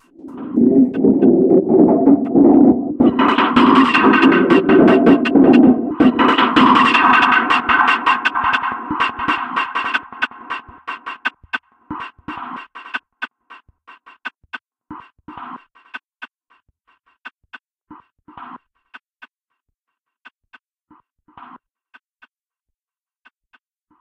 Flower loop 80 bpm 7
Since I really liked his description I had to pay him an honour by remixing this samples. I cutted up his sample, pitched some parts up and/or down, and mangled it using the really very nice VST plugin AnarchyRhythms.v2. Mastering was done within Wavelab using some EQ and multiband compression from my TC Powercore Firewire. This loop is loop 7 of 9.
rhithmic, groove, 80bpm, loop